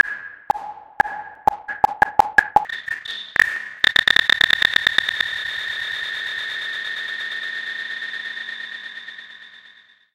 EMBOULE sadia 2015 2016 CRAZYCLOCK
I first created a sound, then added reverb and integrated echo for more resonance and an alternation of accelerating and slowdown tempo with a fade at the end.
Resonance, Echo